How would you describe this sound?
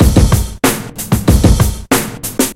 beat reconstruct with vst slicex + a new snare and soundforge 7 for edition
drumloops, beats, hiphop, break, drumbeat, drums, beat, groovy, hip, drum-loop, breaks, loop, drum, drumloop, snare, hop